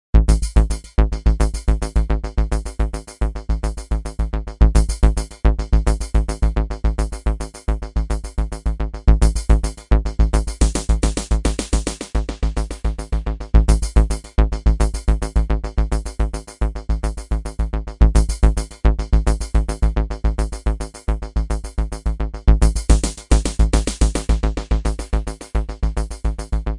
MGS loop made from scratch by kris
loop, atmospheric, suspense, dramatic, movie, background-sound, terror, soundtrack, drama, background, thrill, film, game